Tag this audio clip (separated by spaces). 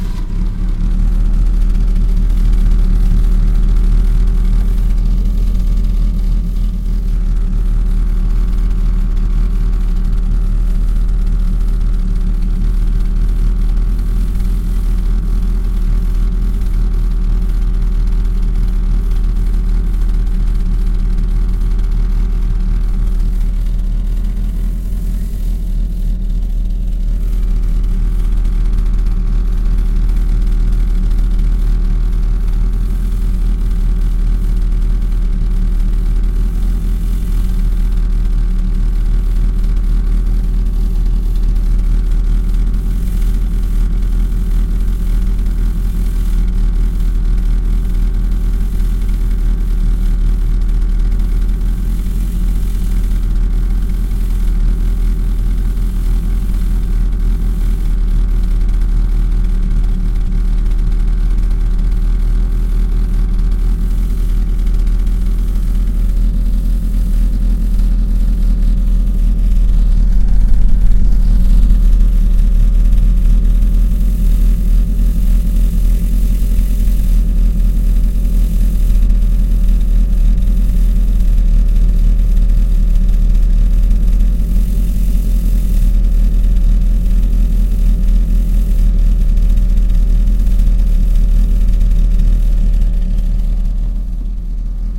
147 1977 70 car engine Fiat interior start starting